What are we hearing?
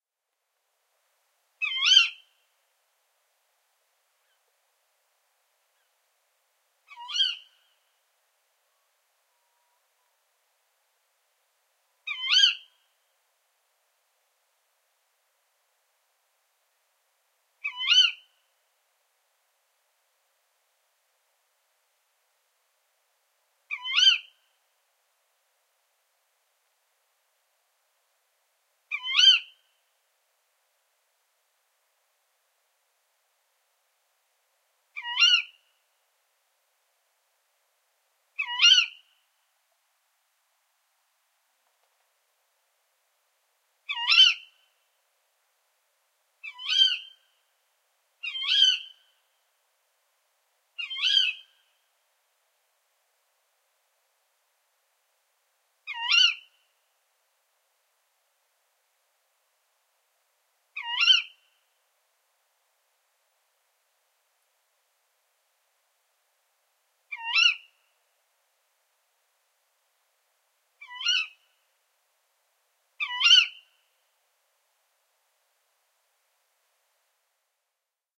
A stereo field-recording of a female Tawny Owl (Strix aluco) screeching.Edited for low end wind rumble. Zoom H2 front on-board mics & Dead Kitten.